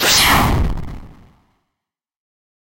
Generated with SFXR. 8 bit sounds for your sound/game designing pleasure!